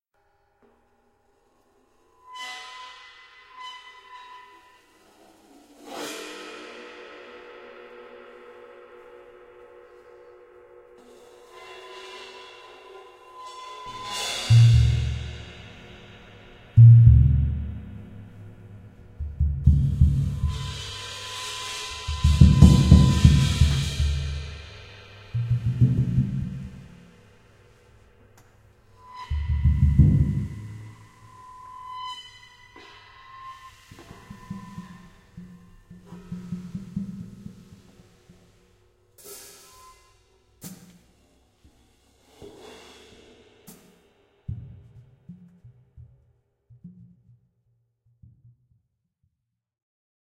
drum effect
Whole Drumset recorded in stereo with 2 Neumann KM184.
The Drummer makes strange noises with his set...
chimes, drums, effect, noise, toms